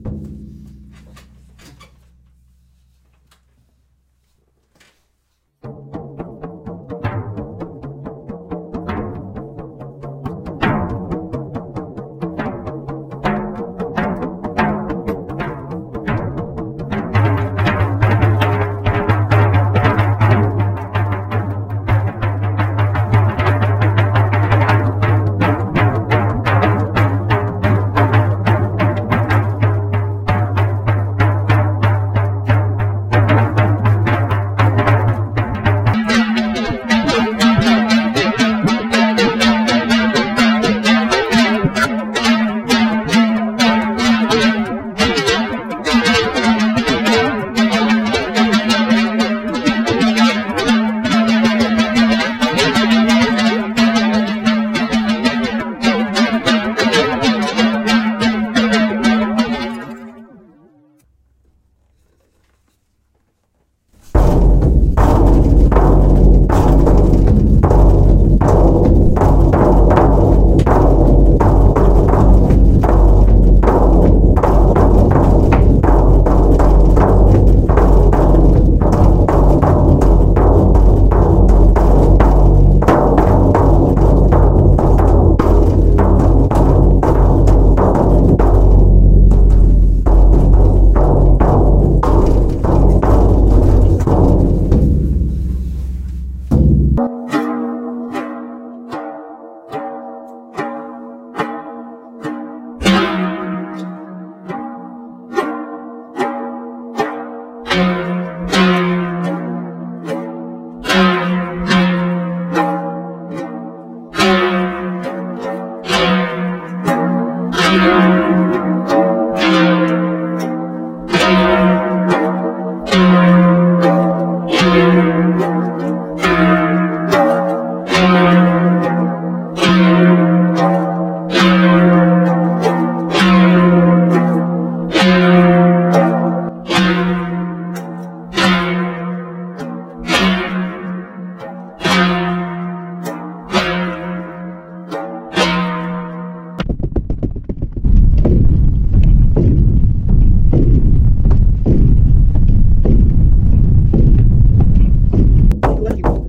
ZZ11DRUM
Hi all, I'm here again. Being retired I have more to do than ever beflore. What you here is one of my miniaturized drums. Don't Believe this drum is only 400x35 millimeters? See that. My smallest drum is only one 1/10 of the one u download now. I had to invent all of my instrumentw, because I have to as I share my bedroom with so much stuff. Enjoy,